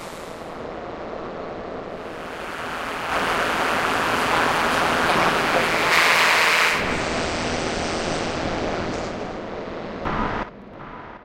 noisy drone sounds based on fieldrecordings, nice to layer with deep basses for dubstep sounds